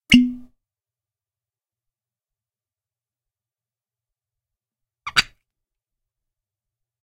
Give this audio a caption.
Bottle open
open, drink, vodka, opening, close, jaloviina, closing, old, alcohol, bottle